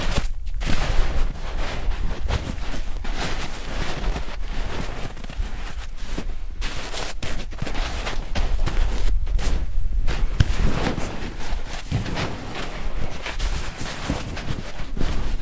knytnävar i snö 1
Some beating with my hands in snow. Recorded with Zoom H4.
beat, hands, snow